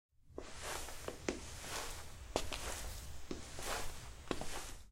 Light Footsteps
walk, stairs, leg, footsteps, feet